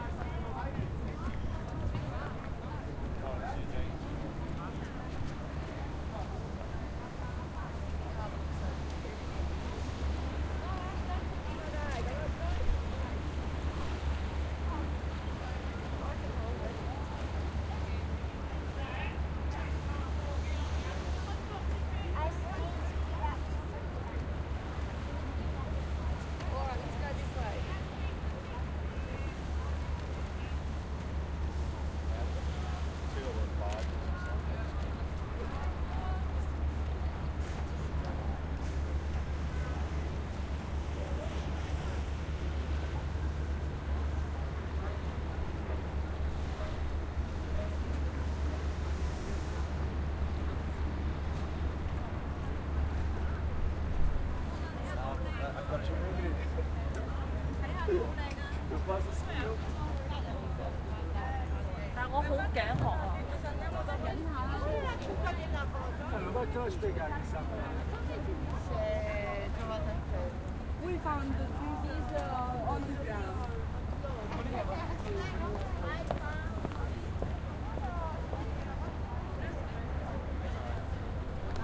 Thames NrTowerBridge2
People/Tourist walking on the embankment of the River Thames at the south side of the Tower of London.
ambiance, ambience, ambient, atmosphere, background-sound, field-recording, general-noise, london, people, soundscape, tourists